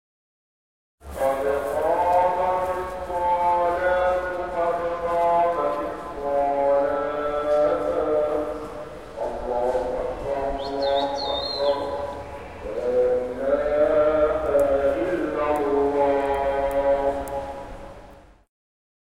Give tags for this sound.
Traffic Alexandria Call Muezzin Pedestrians Egypt

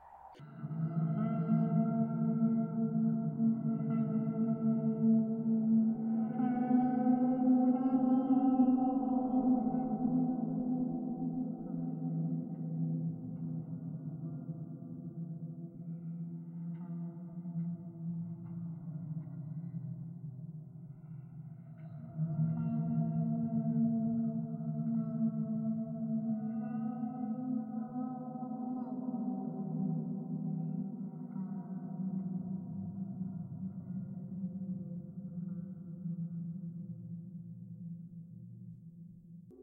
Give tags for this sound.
Creepy Music spooky Strange

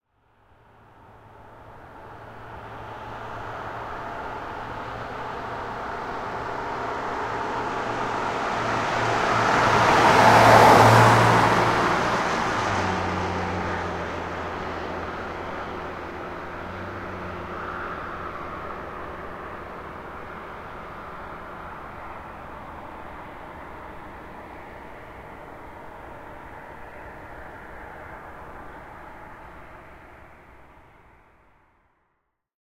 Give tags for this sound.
doppler-effect left-to-right road passing drive engine driving driving-by car motor vehicle

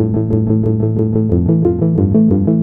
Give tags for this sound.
91 bpm loop synth

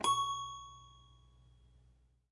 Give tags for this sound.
Carnival Piano sounds Circus packs Toy toy-piano

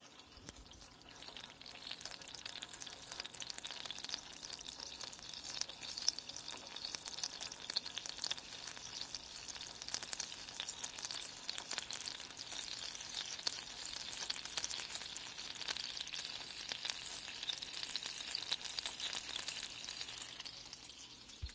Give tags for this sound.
hot oil sizzle Water

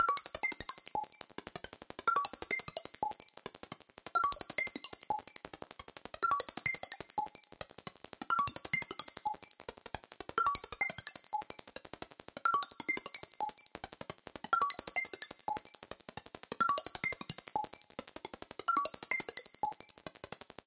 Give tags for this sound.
mechanical beat pattern techno Robot rhythm industrial loop dance

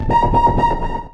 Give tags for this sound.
multisample one-shot

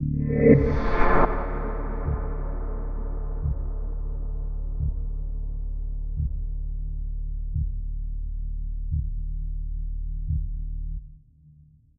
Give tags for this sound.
freaky sound pad ambient evolving horror soundscape experimental drone atmospheres

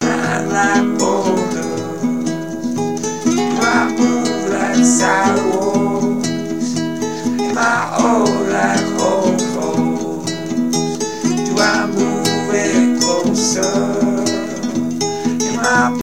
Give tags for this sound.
indie piano Folk drum-beat vocal-loops free rock acoustic-guitar harmony looping Indie-folk loop voice synth melody original-music drums bass guitar samples whistle loops acapella beat percussion sounds

